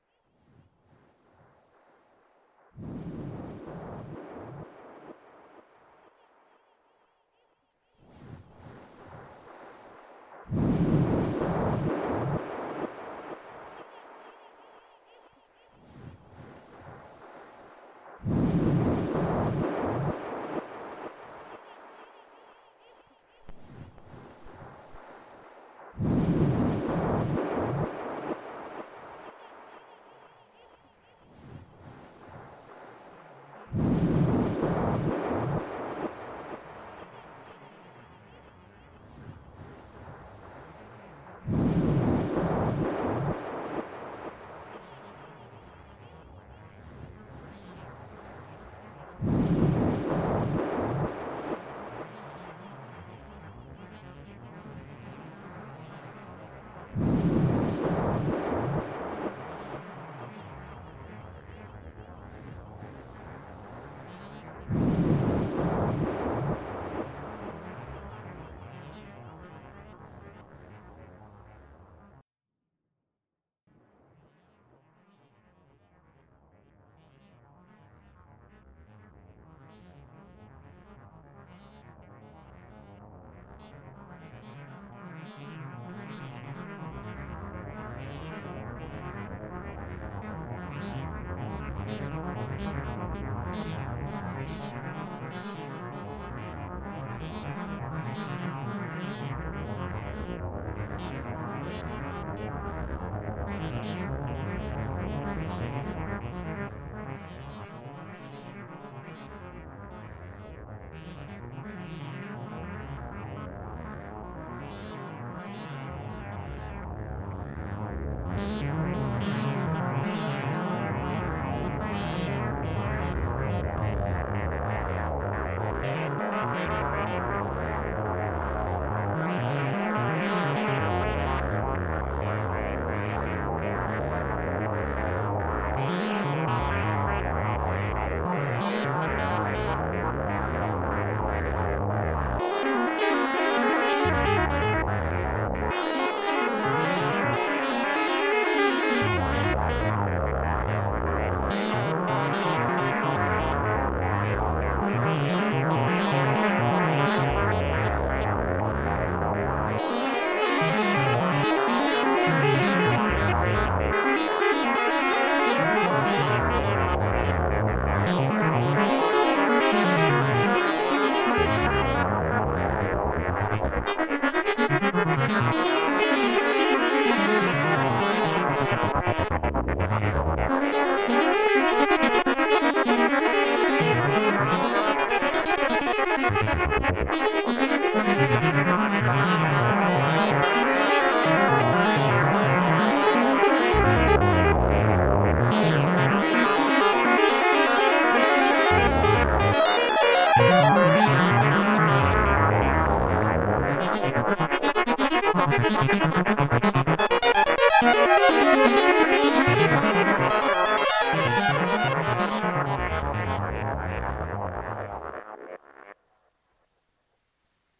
A loop I made that combines my voice with the minibrute.
breath, synthesizer, electronic, arpeggio